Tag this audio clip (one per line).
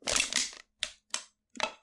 crush
can
beer
soda